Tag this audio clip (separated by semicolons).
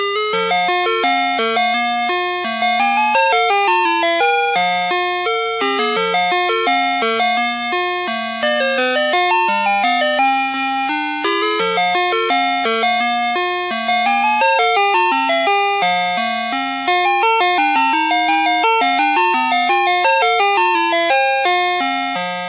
Ice; cream; chime